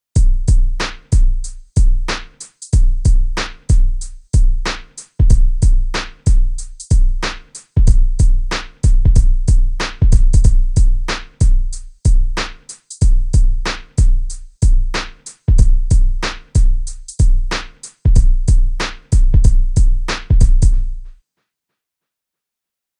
Made on FL studio 10
samples taken from "Producers Kit" downloaded separately.
Written and Produced by: Lord Mastereo
Keep it chaste!
1love_NLW